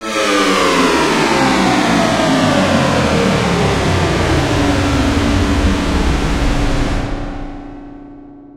Large Machine Shutdown
Neat little thing that I accidentally made when messing with the triple oscillator in LMMS.